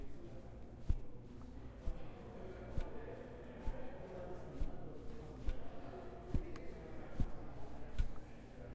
Foottap Slow
A Slow, steady foot tapping
foot, footsteps, Slow, steady, tapping